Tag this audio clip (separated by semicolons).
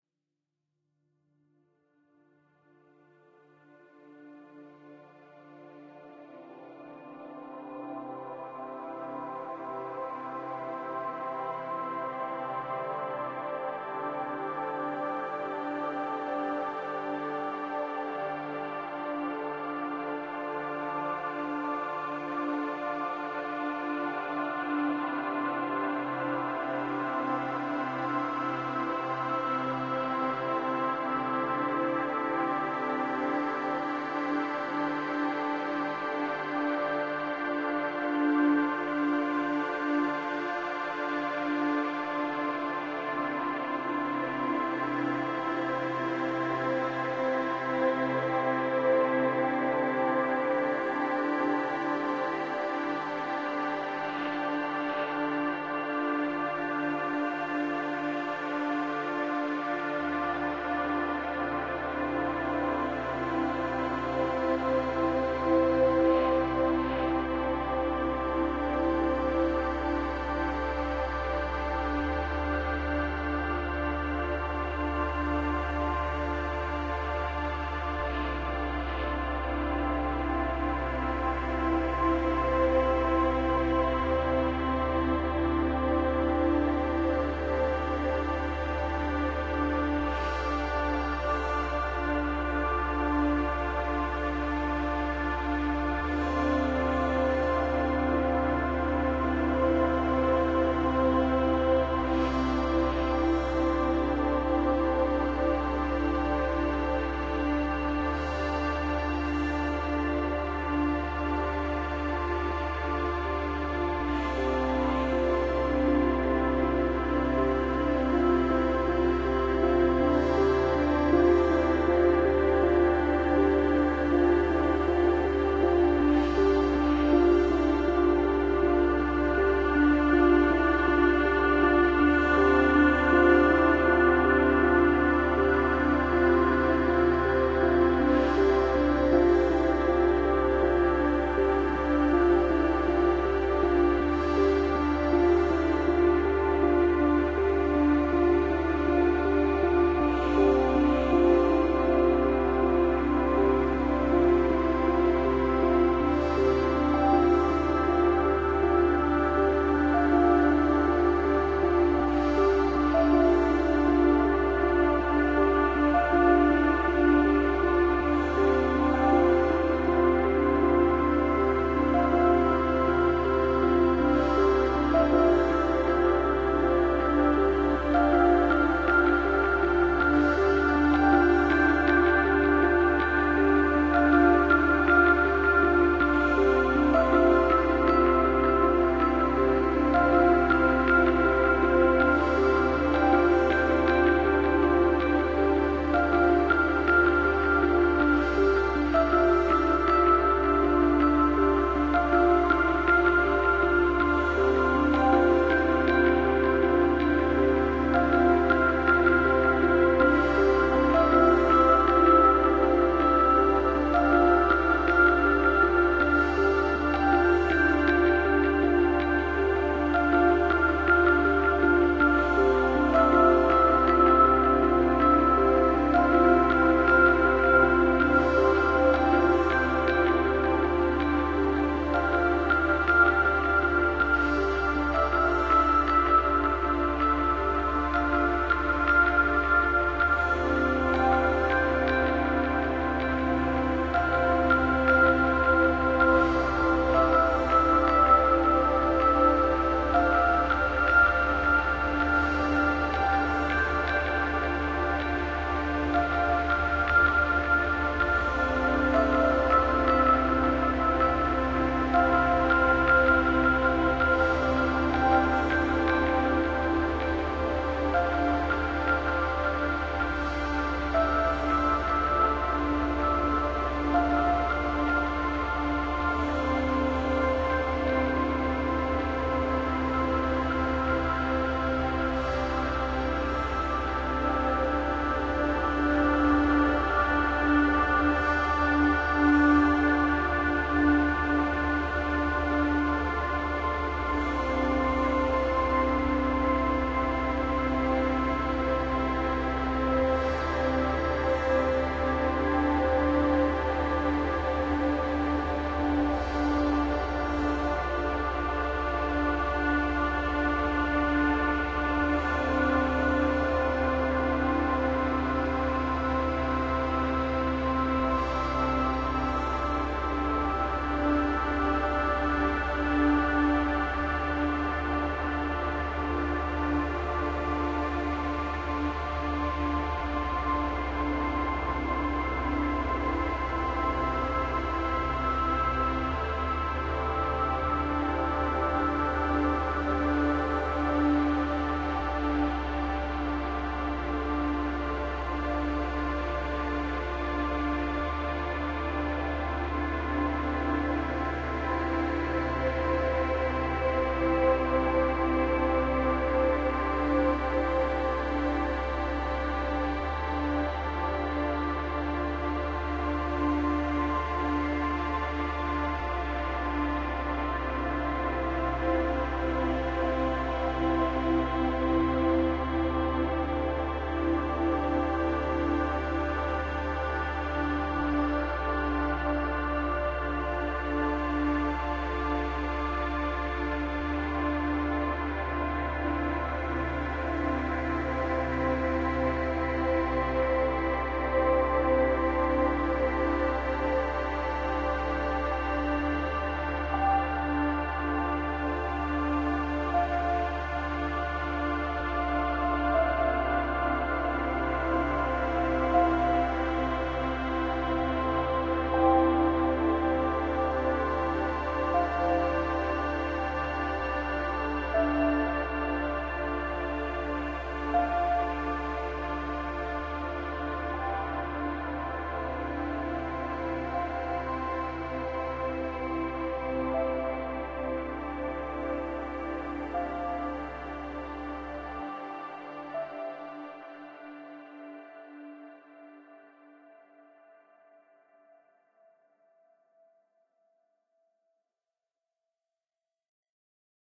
ambient; downtempo; instrumental; relax; space